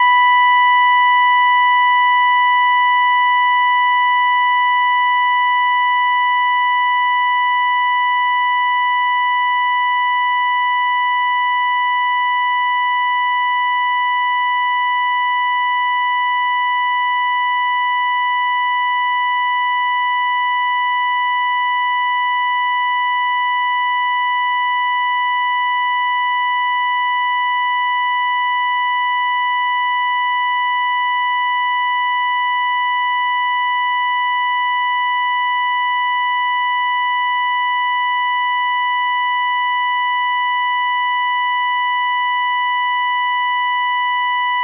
Electrocardiogram dead tone
dead, machine